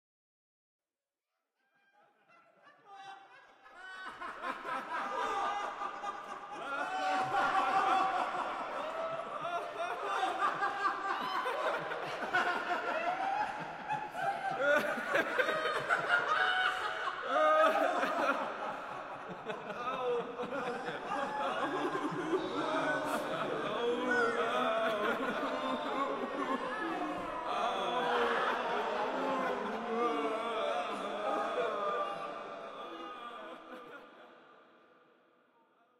group of people laughing too much, so it becomes painfull moaning